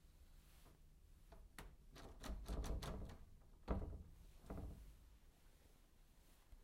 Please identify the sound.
Locked Door
I really wanted to record the ambience in this room for you folks, but the door was locked. But I figured, you know, sometimes that happens. And it still makes a sound. So here we are.